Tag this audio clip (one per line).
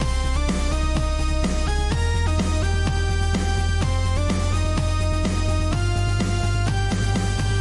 Cinematic
Loop
Sample